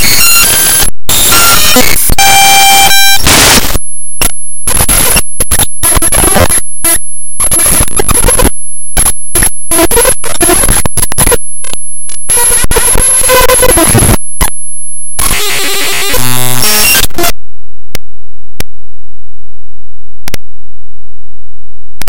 Scary glitch noises
I imported a hl2 map in audacity and well, it made weird glitch noises.
i dont have any real practical use for it other than it sounds creepy.
fear, movie, weird